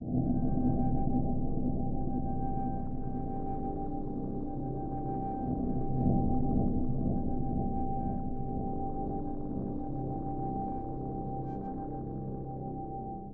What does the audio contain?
sinusmorph1-90bpm
High an low pads and drones mixed in a nice texture.Ambient texture. 90 bpm 4/4. Duration: 5 bars.
ambient, textures, drone, pad, synth, envirement